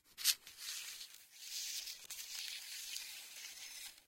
Queneau frot metal 08
prise de son de regle qui frotte
piezo, metallic, metal, clang, cycle, rattle, steel, frottement